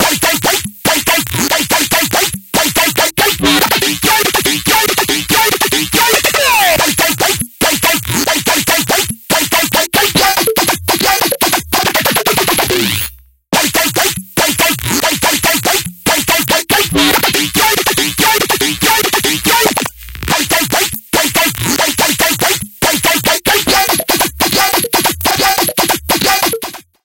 Comically typical 'FM From B' type wubs at 142 BPM
made for my 2019 track 'Knobhead' with Serum